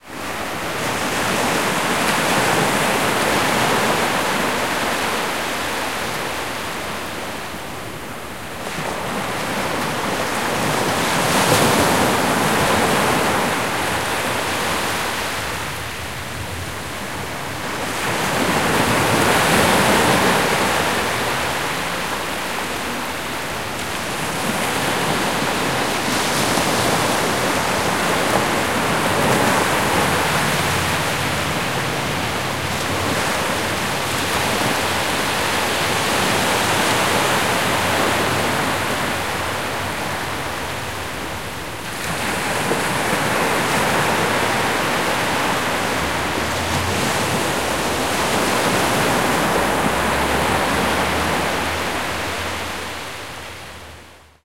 Waves on Brighton beach
Waves recorded at Brighton Beach Sussex, November 2016.